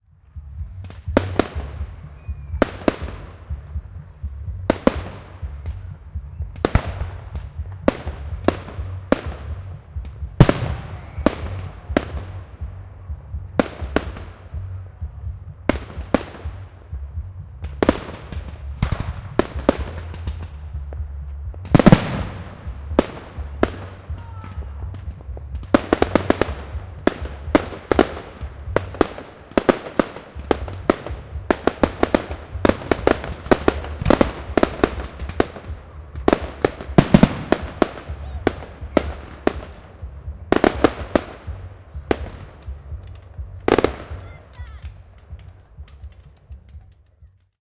Fireworks, Close, A (H4n)
Raw audio of a fireworks display at Godalming, England. I recorded this event simultaneously with a Zoom H1 and Zoom H4n Pro to compare the quality. Annoyingly, the organizers also blasted music during the event, so the moments of quiet are tainted with distant, though obscured music.
An example of how you might credit is by putting this in the description/credits:
The sound was recorded using a "H4n Pro Zoom recorder" on 3rd November 2017.
Explosion, Display, Year, New-year, Fawkes